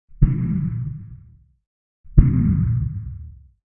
Two experimental explosion noises made for a space battle scene, using only vocal sounds (basically the same noises you may have made with action figures as a kid), plus some mic technique and a bunch of filters.
battle, explosion, scifi, space